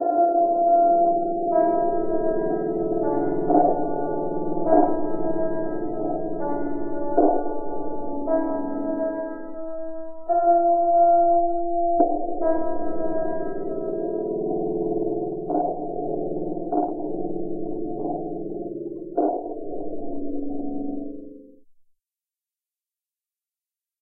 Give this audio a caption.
STM2 intro pianoknock
Faint piano middle tones of an over processed midi sequence.